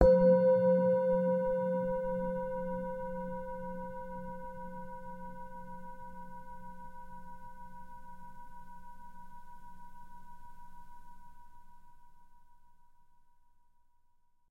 singing bowl
single strike with an soft mallet
Main Frequency's:
182Hz (F#3)
519Hz (C5)
967Hz (B5)